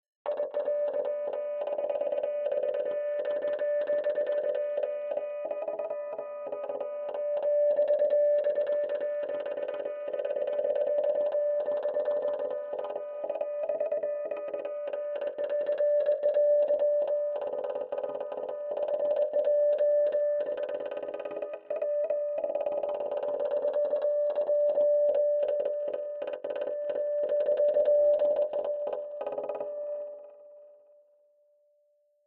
PIEDMONT NEW MEXICO
Electronics, Morse-Code, Science, Signal